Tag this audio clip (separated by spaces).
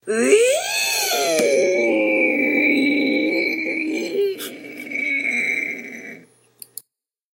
scard shock o-my nada nope surprise just-no na not-that o noooooooo shoke no